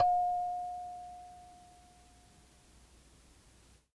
Tape Kalimba 5
Lo-fi tape samples at your disposal.
collab-2, Jordan-Mills, kalimba, lo-fi, lofi, mojomills, tape, vintage